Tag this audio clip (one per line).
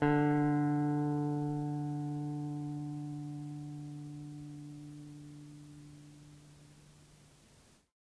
collab-2
el
guitar
Jordan-Mills
lo-fi
lofi
mojomills
tape
vintage